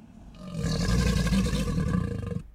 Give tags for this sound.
monster; roar; growl; snarl

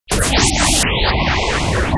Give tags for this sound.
additive; weird; synthesizer; digital; noise; synthesis; synthetic; synth

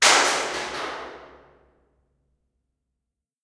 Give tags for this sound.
field-recording; hit; resonant

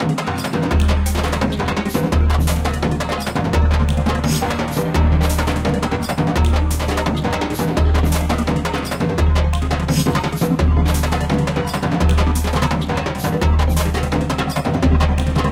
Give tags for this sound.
brazil; electronic; samba

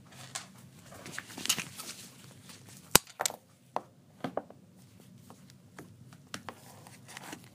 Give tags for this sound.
fiddling; random; noise; hit; hits; metal; variable; binoculars; thumps; taps; voice; brush; scrapes; objects

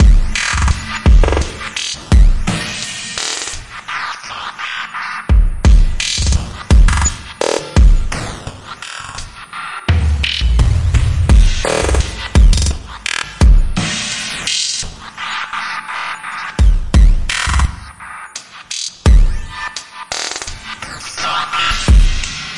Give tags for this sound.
beat; drum; drum-loop; drums; electronic; glitch; groovy; loop; percussion; percussion-loop; rhythm

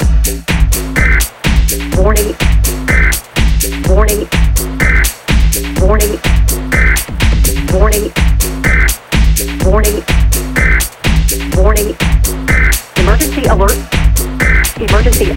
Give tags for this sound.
Electro; Loop; Samples; Techno

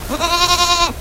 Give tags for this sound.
animal; farm; field-recording; goat

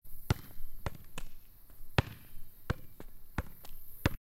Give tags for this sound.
football; soccer; ball; concrete

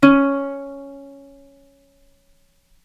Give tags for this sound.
ukulele; sample

